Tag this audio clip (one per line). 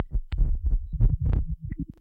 beat
digital
drum
drum-loop
drum-pattern
drums
electronic
minimal
percs
percussion